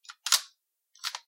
A recording of a Cooey 600 Bolt Action .22 rifle being cocked/loaded. Recorded and ran through Audacity to remove noise.
bolt-action, reload